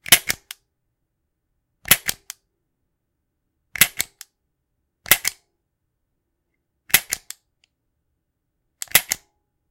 Taurus G2c Dry Trigger Pull
Dry firing my 9mm Taurus G2c. Multi-Purpose. Recorded indoors using a Blue-Yeti microphone. Cleaned in Audacity.
military, foley, dry, shooter, snap, trigger, dry-fire, Taurus, gun, glock, 9mm, pull, police, switch, out-of-ammo, reload, pistol